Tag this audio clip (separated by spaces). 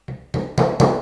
nail hammer